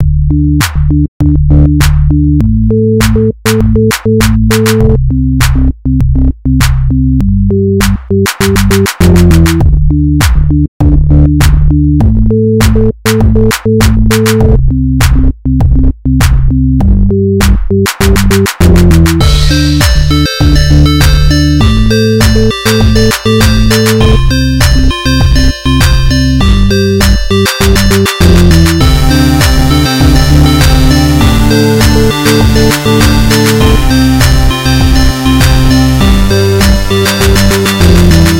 Catchy loop at 100 bpm. Reminds me of walking in the hood. Includes samples such as Kicker, TripleOscillator, Square, and crash02. Created by me in LMMS on 8/1/17.
bpm, percussion, hood, sound